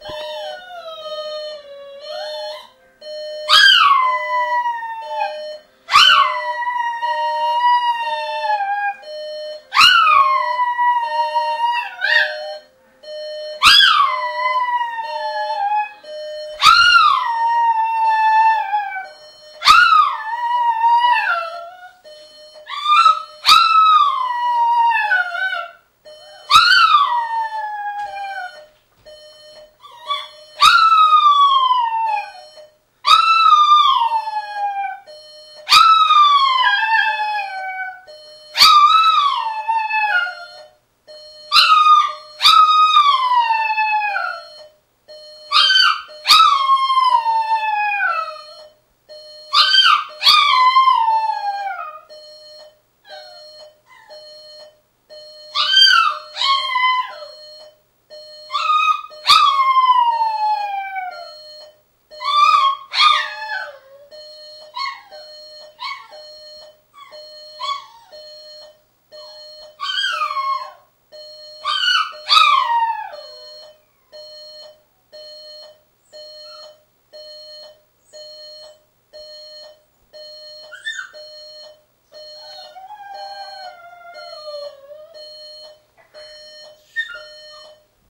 Shaggy howls at the alarm clock recorded with DS-40 with the stock microphone for the last time.
alarm, ambiance, dog, howl